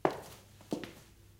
Walking in High-Heels on Tile
A female that is on the walk down a the yellow tiled road.